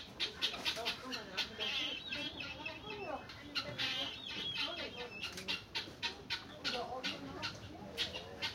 Guinea fowls.
Mobile phone recording.
Fowl, Guinea, Perlhuhn